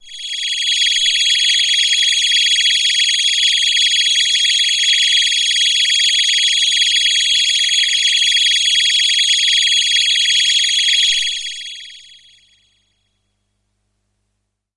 Space Pad - G#6
This is a sample from my Q Rack hardware synth. It is part of the "Q multi 012: Spacepad" sample pack. The sound is on the key in the name of the file. A space pad suitable for outer space work or other ambient locations.
multi-sample, space, electronic, ambient, waldorf, space-pad, pad, synth